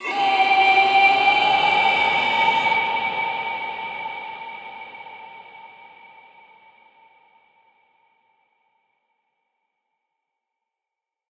1. of 4 Monster Screams (Dry and with Reverb)
Monster Scream 1 WET
Atmosphere, Creature, Creepy, Eerie, Effect, Fantasy, Film, Game, Growl, High, Horror, Huge, Monster, Movie, Mystery, pitch, Reverb, Roar, Scary, Sci-Fi, Scream, Sound, Sounddesign, Sound-Design, Spooky, Strange, wet